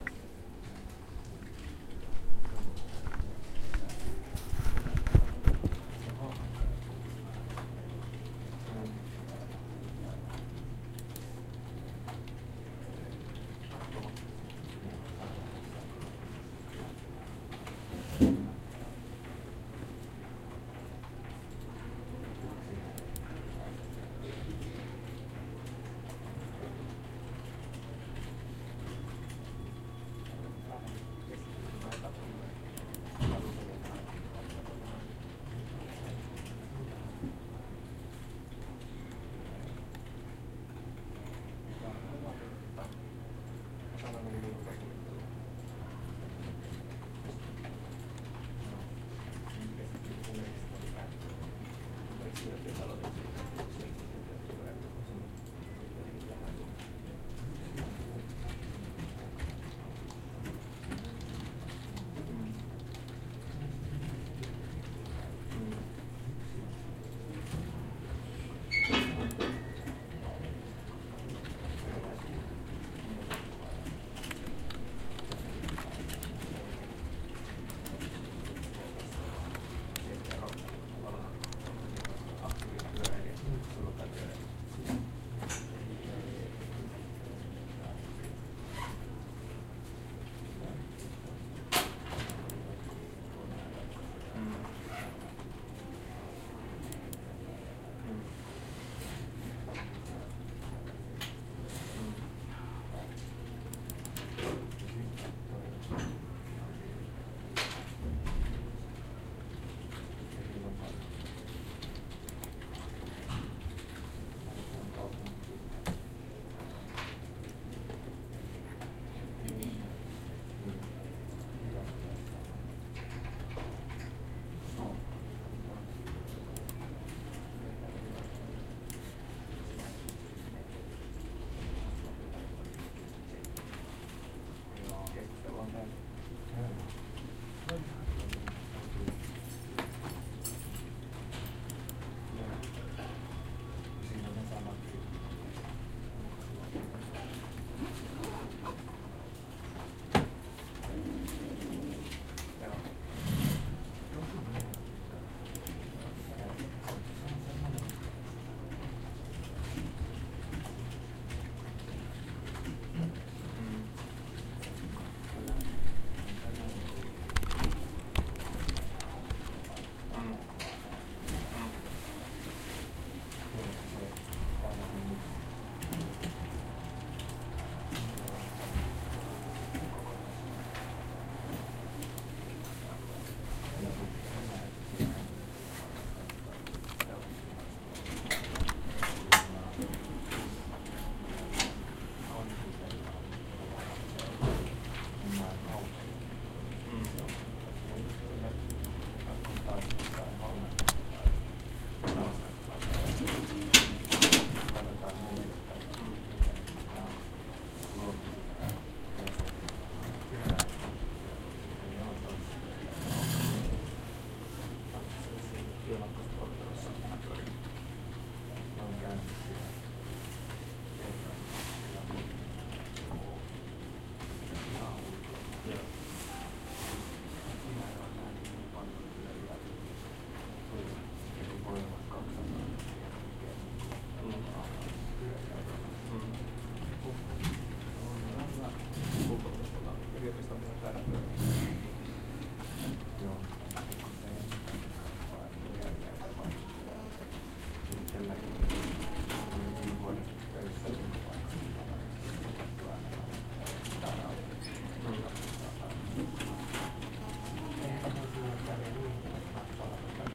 Library sounds
This is a sound recorded at my university library in the part that many of the computers are.
computers, library, people